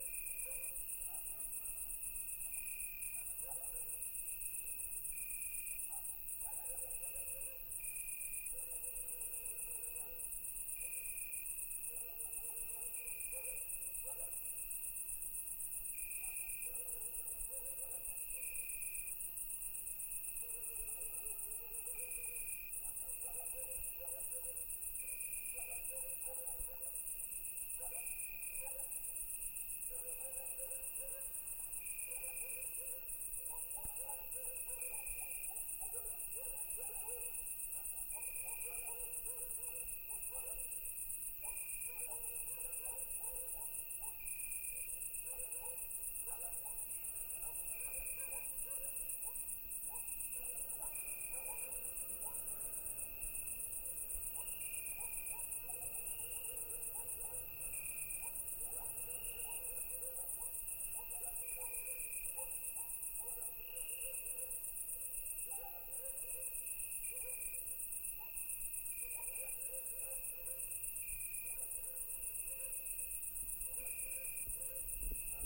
Summer Istria Nature Sound
Sound recorder in Pazin, Istria in August Summer 2015, sound of nature during the night, recorded with Zomm H5n and additional mic besides